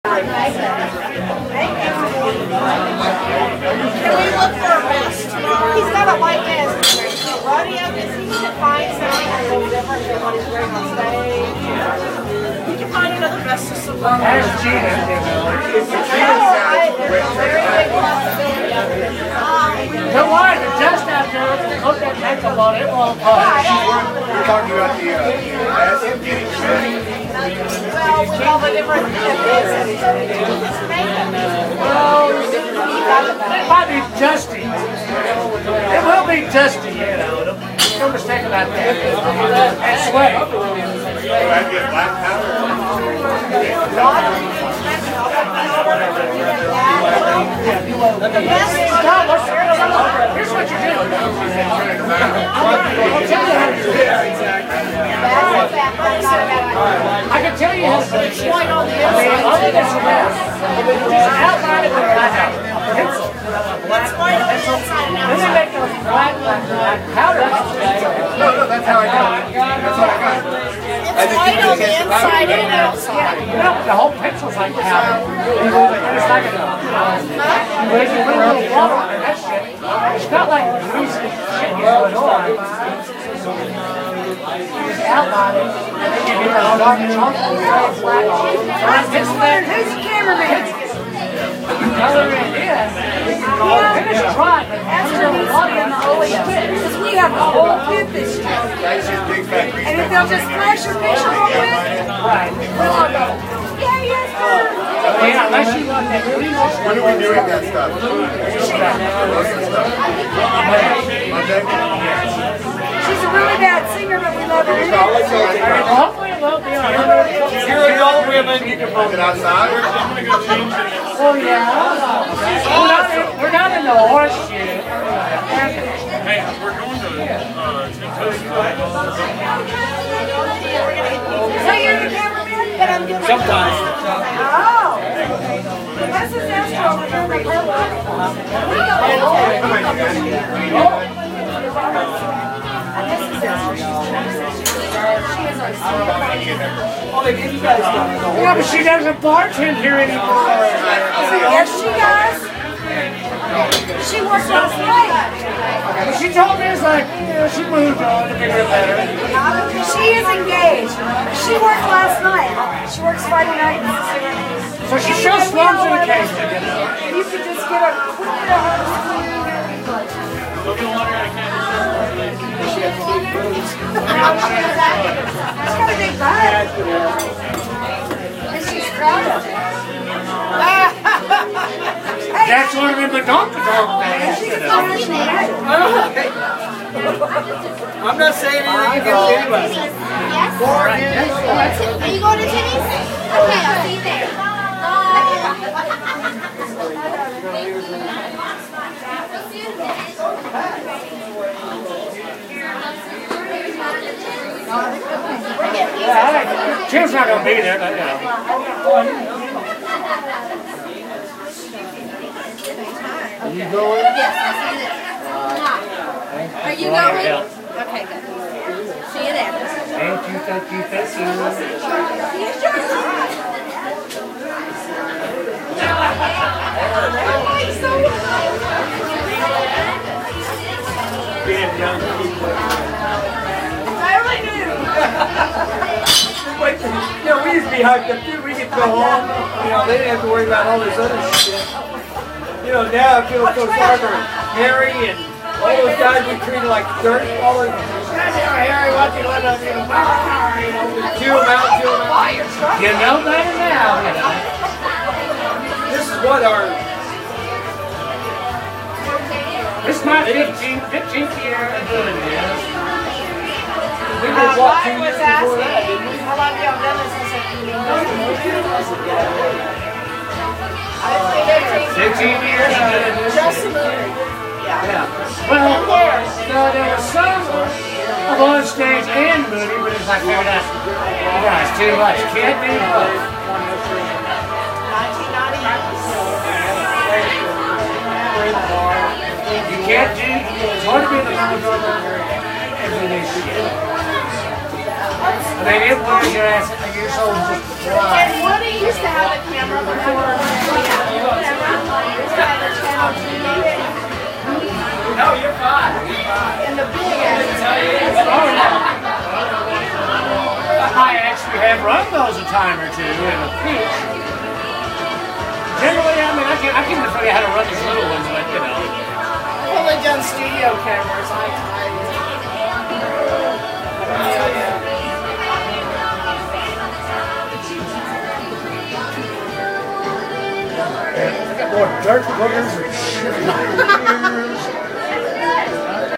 Recorded in the Field Office, a noisy Texas dive bar, full of beer drinkers and loud friends.
crowd, dive-bar, field-office, party